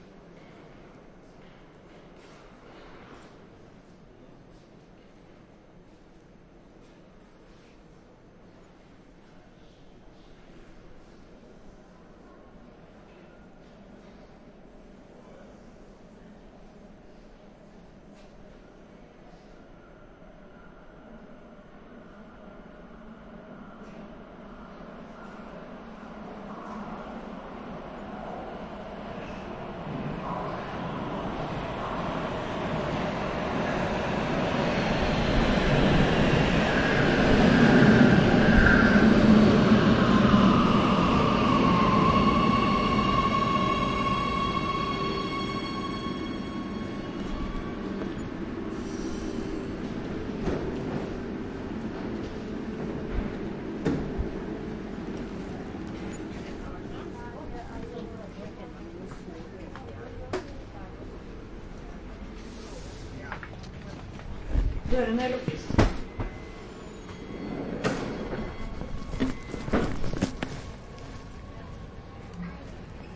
Recording train arrival, from left to right, at Grønland t-bane station. Equipment: Roland R-26, internal XY and omni microphones mixed down as stereo.